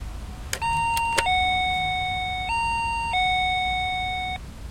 Phono lock beep sound
beep, electronic, lock, phono